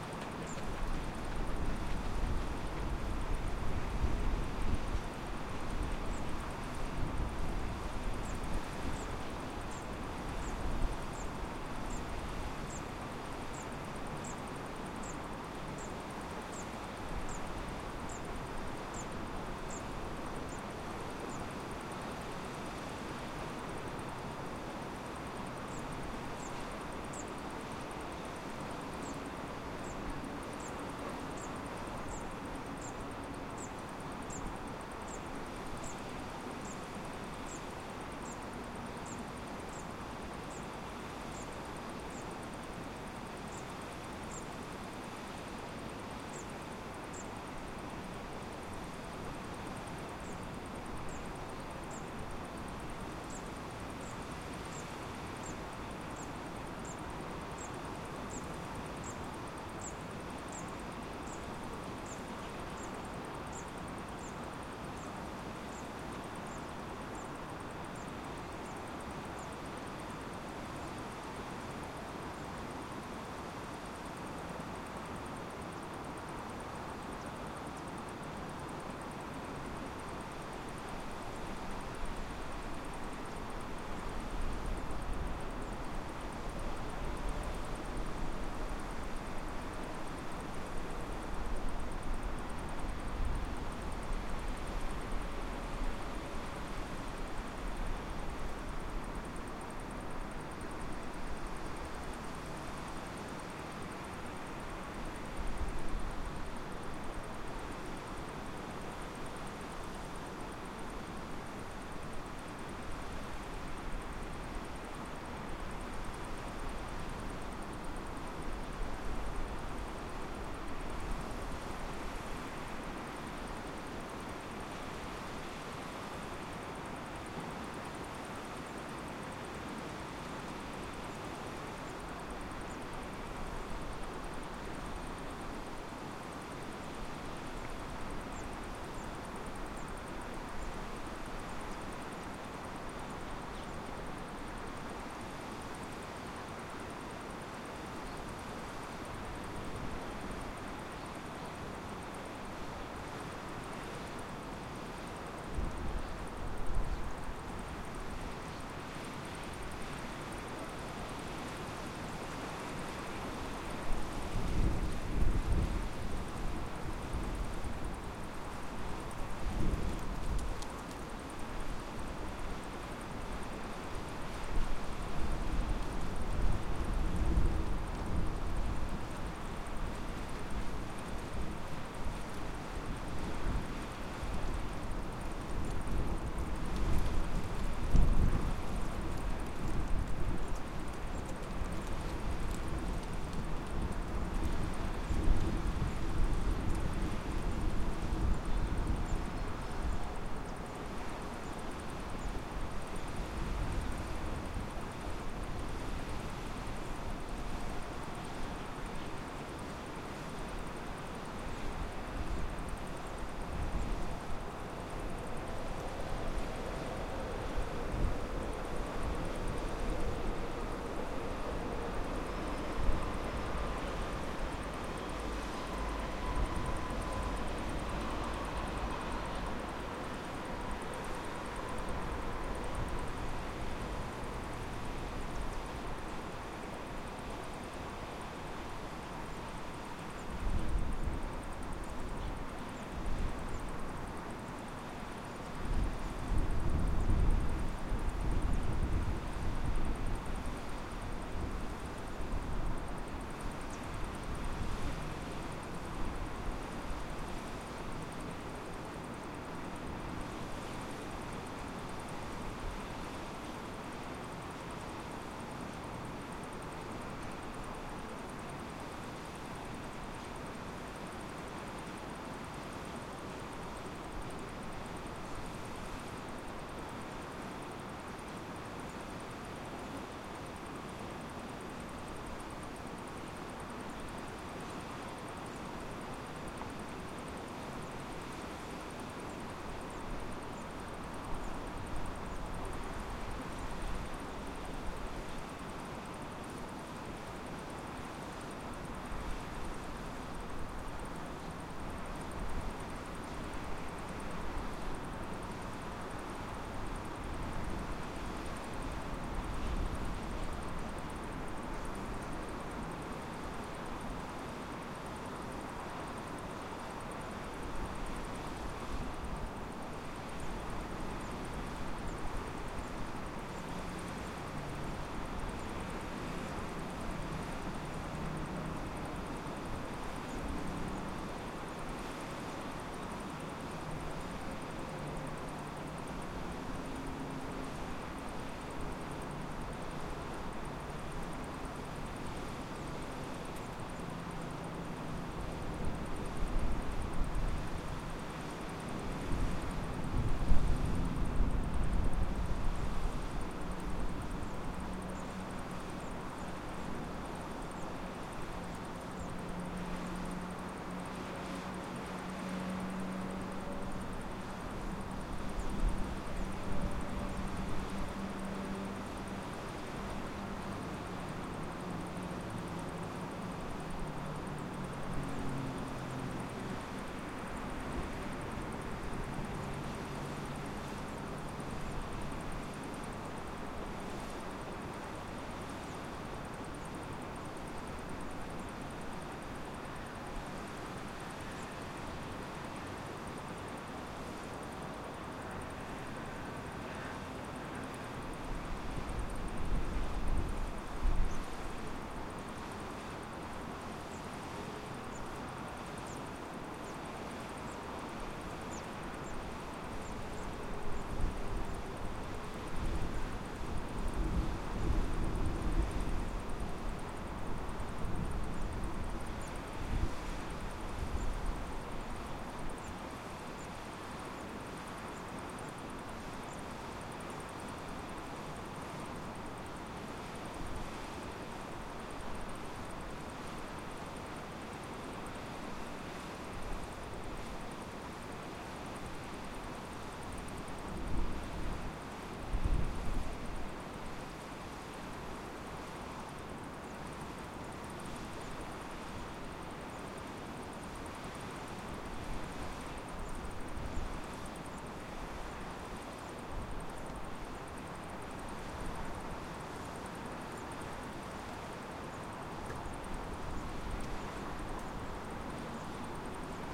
River Ambience Recording at Parc Casa de les Aigues Montcada, August 2019. Using a Zoom H-1 Recorder.